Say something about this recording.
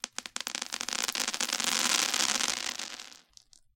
A weird fizzle.